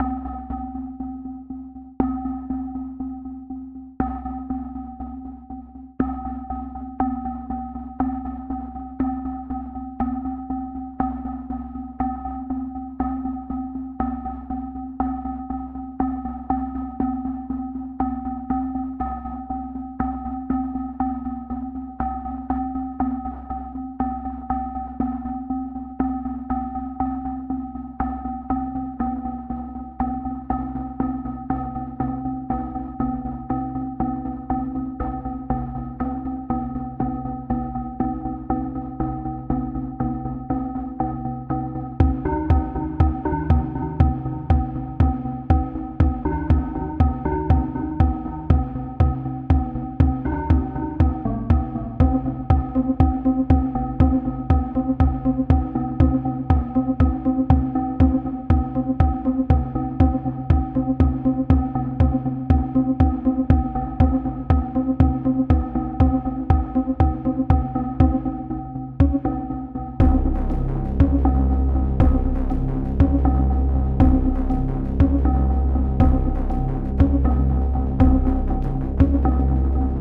Interscope (stealth music)
Background music for suspenseful and stealth scenes, 120.00 bpm, 1 bar 1/4, Made in Ableton live
STIX